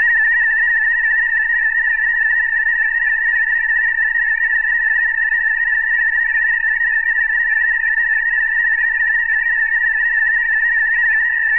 SonicDeathRay 1.8KHz
Warning: this sound is itself a weapon -- listening to it can cause death, or at least severe annoyance.
A seamless loop of a continuous beam sound along the lines of how it was imagined for old sci-fi movies, meaning multiple cross-modulated oscillators. This one has a peak of frequencies in the 1800 Hz range, extending up between 2KHz and 3KHz, so it really gets inside your head and makes it explode. This was created in an Analog Box circuit, which makes it very easy to tweak all the parameters, then turned into a loop with just a bit of editing in Cool Edit Pro.
abox, B-movie, dangerous, death-ray, laser, ray-gun, sci-fi, synthetic, vintage